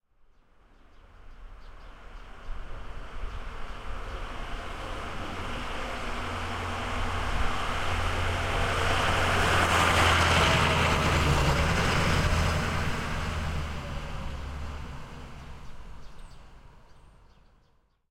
truck real peterbilt delivery slow speed throaty winter harsh
delivery, harsh, peterbilt, real, slow, speed, throaty, truck, winter